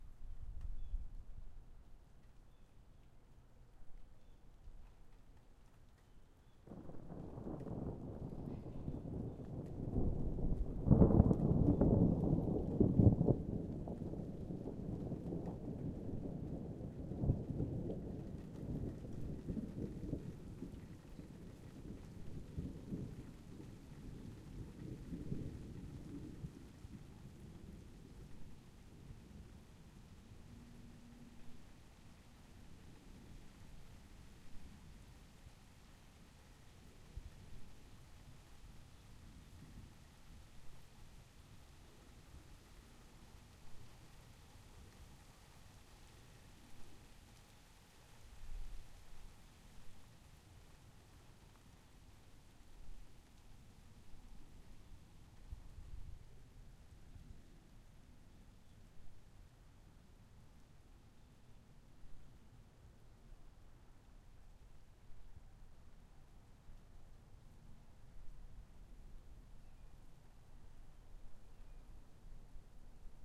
Some high cloud to cloud thunder - very little rain at this point. Recorded with Zoom H4